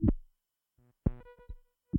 YP 120bpm Plague Beat A01
Add spice to your grooves with some dirty, rhythmic, data noise. 1 bar of 4 beats - recorded dry, for you to add your own delay and other effects.
No. 1 in a set of 12.
drum, minimalist, uptempo, percussion, 4-beat, urban, glitch, rhythm, drum-loop, idm, drums, minimal, 1-bar, percs, data, glitchy, beat, percussive, electronic, noise, 120bpm, percussion-loop, rhythmic, digital, glitchcore, drum-pattern, 120-bpm, loop, dance, up-tempo